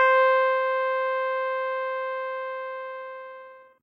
mt40 ep 084
casio mt40 el piano sound multisample in minor thirds. Root keys and ranges are written into the headers, so the set should auto map in most samplers.
digital, keyboard, multisampled, synthesised